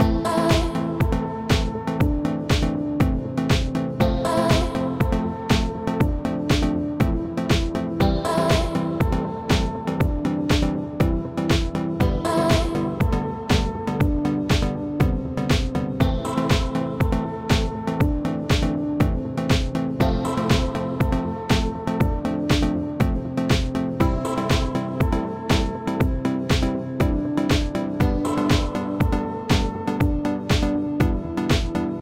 gear infinite 010 - electronic loop mode.
house, mode, infinite, synth, club